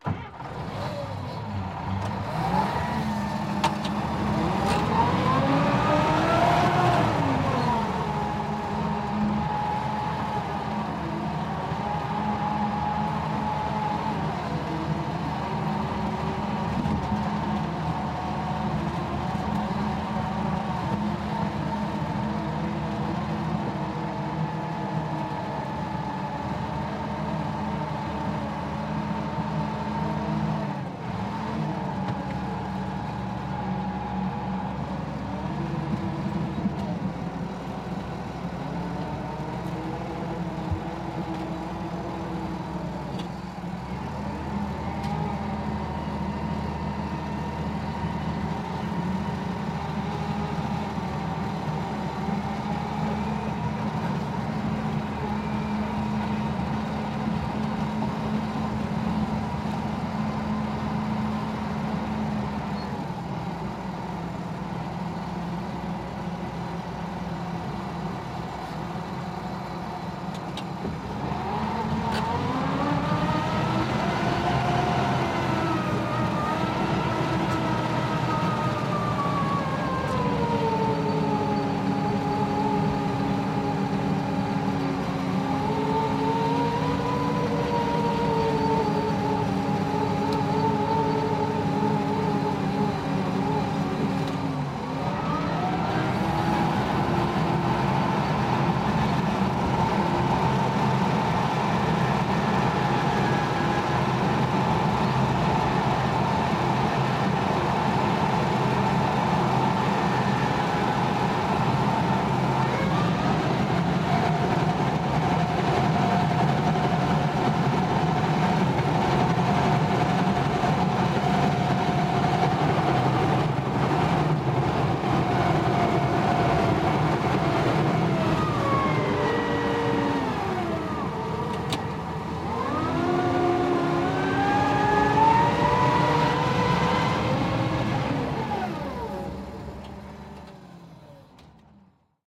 Sound of tractor on landfill. Tracktor starts working using lifter. Recorded on Zoom H4n using RØDE NTG2 Microphone. No post processing.